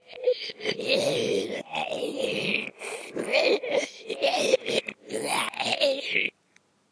zombie sounds
sound, effects, or, zombie